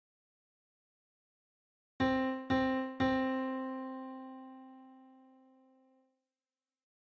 Do C Piano Sample Do C Piano Sample